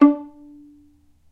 violin pizzicato "non vibrato"